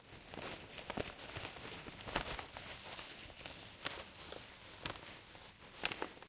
Close recording of a stiff piece of cloth, paper-like, making a rustling sound.